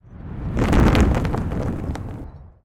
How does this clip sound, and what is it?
dropping a heap of small things
the noise of lots of small semi-soft objects dropping to the floor all at once.
dropping, drop, falling, crash, fall